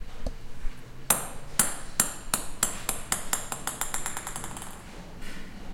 ping pong ball bouncing